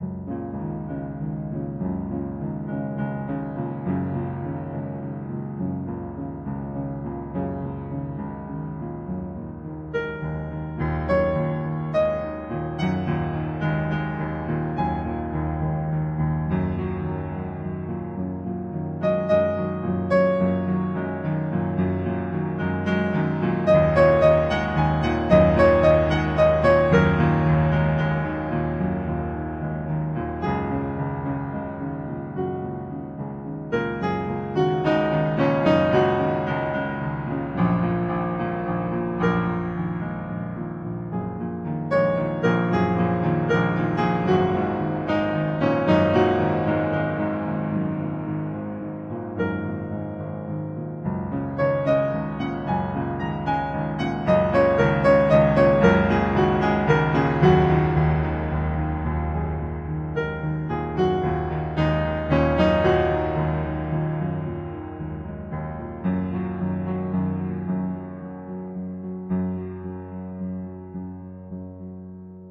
F Sharp Pentatonic Improv 05
Deep, even rumbling, soulful, Celtic sound, keys
pentatonic, celtic, instrumental, keys, soulful, deep, improvised, piano, f-sharp